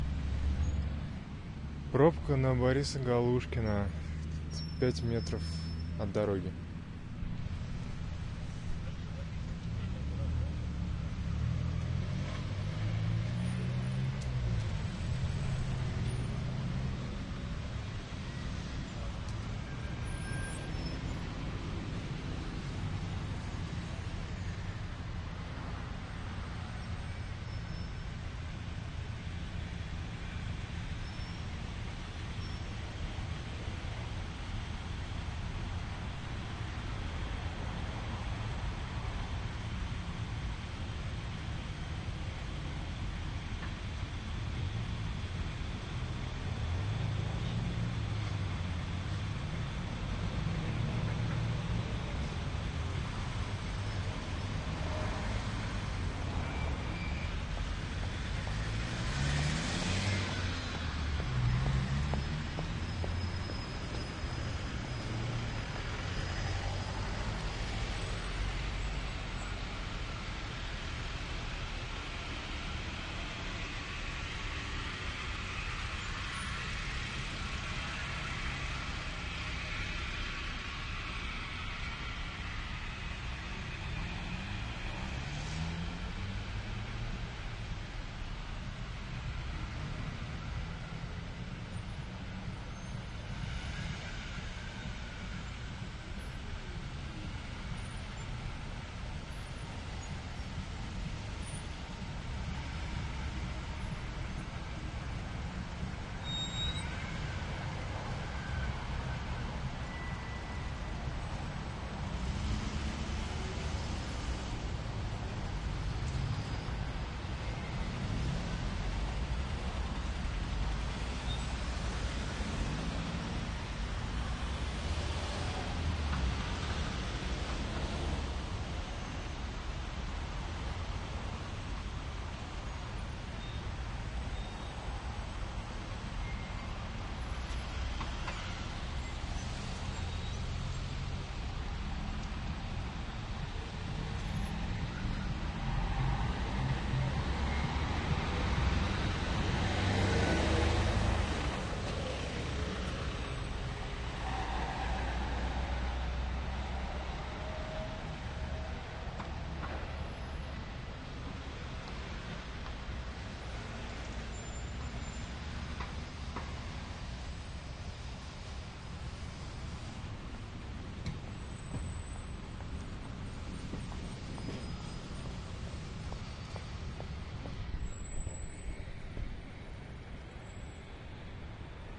Traffic jam in moscow, 5 meters from the Borisa Galushkina street. Two Sony C74 microfones (AB)
field-recording, jam, Moskow, traffoc